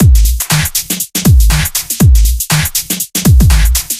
A fairly bouncy beat. Made in FL studio, using mostly Breakbeat Paradise.